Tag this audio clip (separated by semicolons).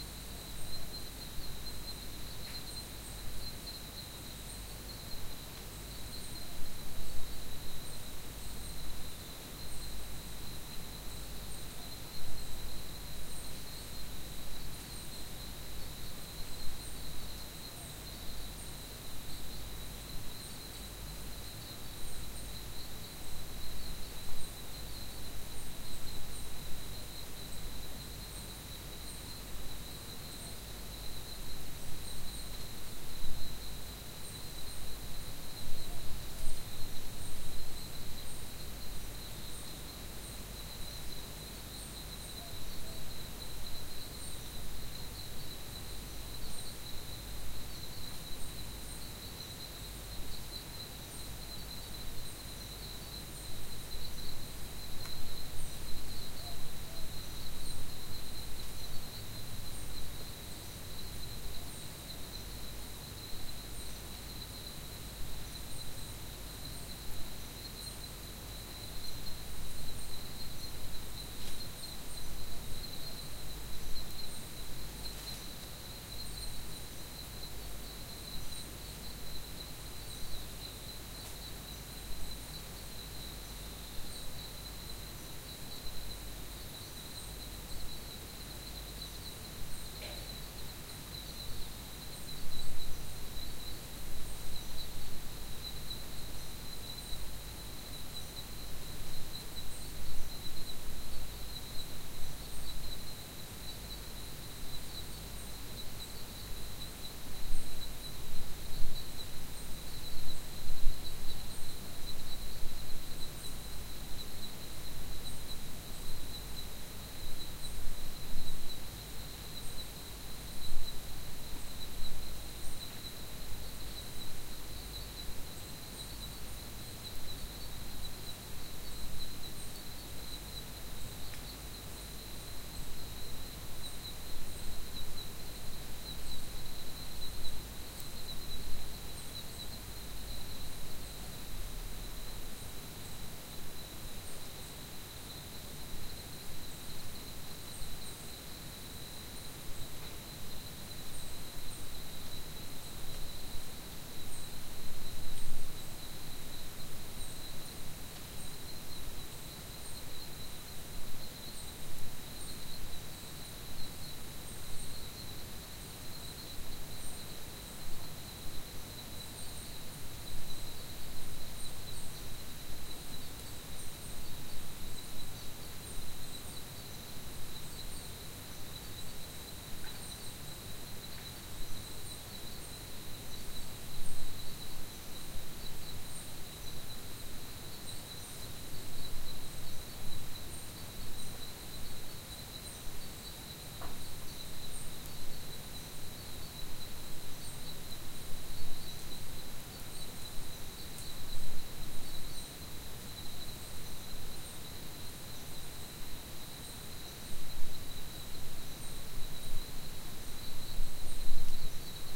night; trees; crickets